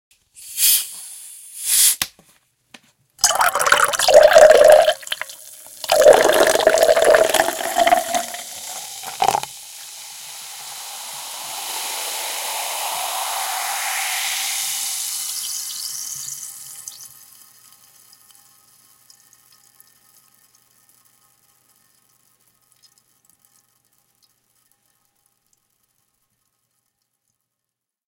Water rocket - pouring acidolous water into glass
Pouring carbonated water into a thin-walled drinking glass.
2 x Rode NT1-A (matched pair)
drink, soda, carbonated, pouring, fizzy